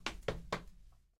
creature steps
creature, monster, steps